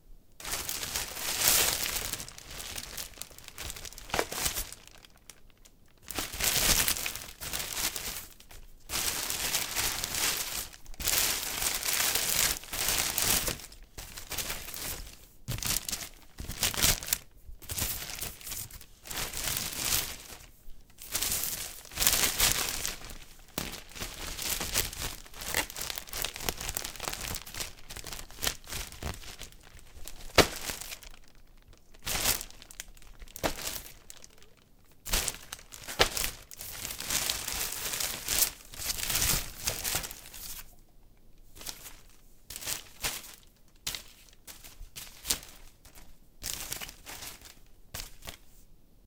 Lifting up, setting back down and handling a plastic bag.
Recorded using a Zoom H6 with the shotgun capsule.
The is a raw recording but in some cases this recording may sound better if you cut away some of the lowest frequencies. This i believe will make the recording sound like it was recorded further away from the plastic bag as the recording may contain some low frequencies since it was recorded quite close to the plastic bag.